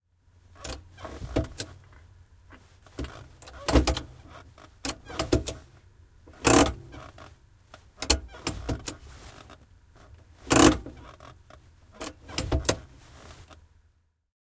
Käsijarru, henkilöauto / Handbrake, car, clicks and creaks, interior, close sound, Volkswagen 1300, a 1971 model, VW
Käsijarru päälle ja pois muutaman kerran, narahduksia ja naksahduksia, lähiääni, sisä. Volkswagen 1300, vm 1971, kuplavolkkari, VW.
Paikka/Place: Suomi / Finland / Nummela
Aika/Date: 27.09.1994
Field-Recording, Finland, Suomi, Autot, Yle, Narahdus, Tehosteet, Naksahdus, Soundfx, Parking-brake, Auto, Yleisradio, Cars, Motoring, Autoilu, Handbrake, Car, Creak, Click, Finnish-Broadcasting-Company